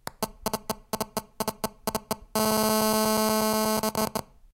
Interference from a cell phone.
Recorded with a Zoom H1.